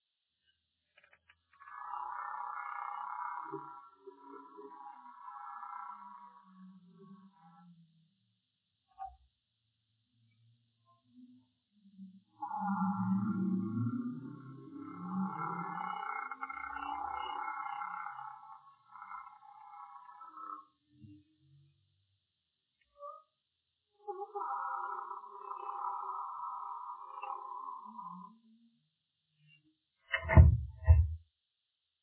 free sound, efects puerta chirriando